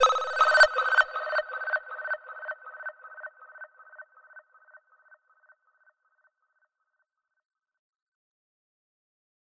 Sampling Illustration (G/A#)
This sound is a simple example of creative use of the sounds of this pack.
I copied the note G sample with altered volume in a new channel (stereo) and applied different delay effects on each channel. Then I mixed it with a volume altered and reversed version of the A# sample. Finally I applied a gentle reverb.